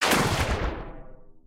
Impact liquid water magic spell pitch down whoosh
liquid, whoosh, spell, Impact, magic